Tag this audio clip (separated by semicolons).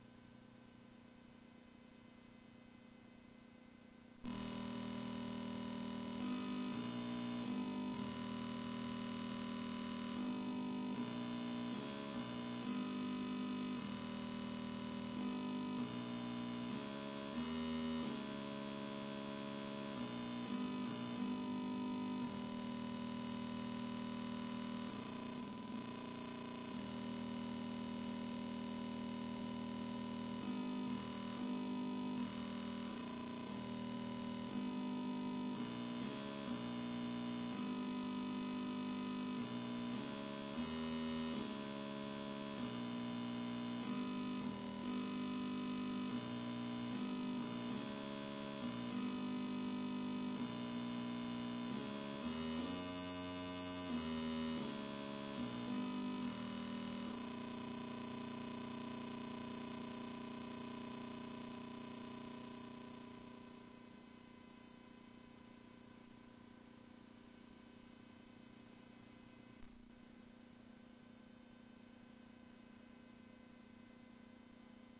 transformers; songs; electronics